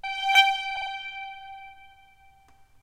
Needed an echoing violin sound for use in a horror video game. Could also be good in a loop for machine/scifi sound.
creepy, sharp, horror, violin, sciencefiction, halloween, string, scifi